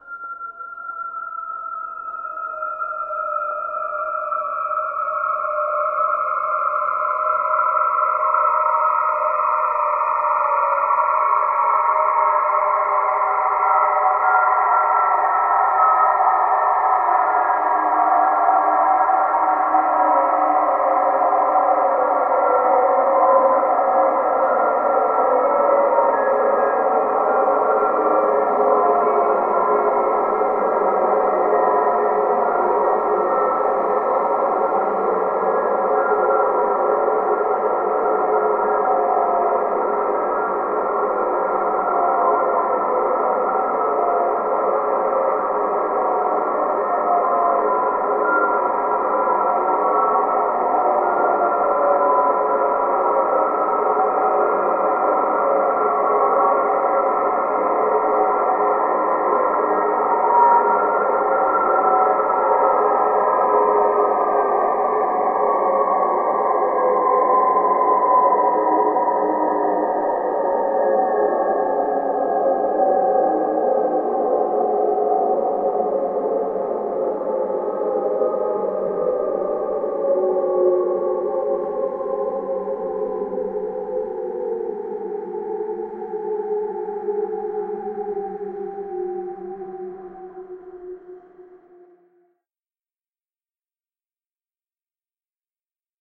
Space Sweep 04
This sample is part of the “Space Sweeps” sample pack. It is a 1:36 minutes long space sweeping sound with frequency going from high till low. Deep space atmosphere. A bit metallic. Created with the Windchimes Reaktor ensemble from the user library on the Native Instruments website. Afterwards pitch transposition & bending were applied, as well as convolution with airport sounds.